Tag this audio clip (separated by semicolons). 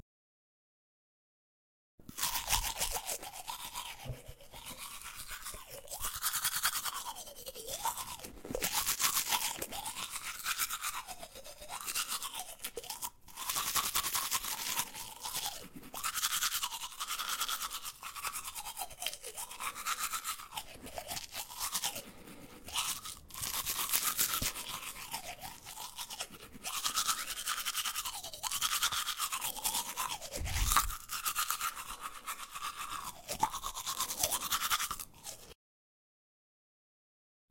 bathroom; cleaning; CZ; Czech; Panska; teeth